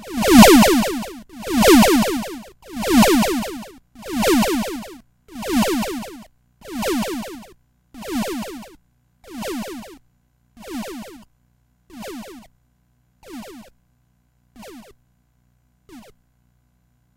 Atari FX 10
Soundeffects recorded from the Atari ST
Atari, Chiptune, Electronic, Soundeffects, YM2149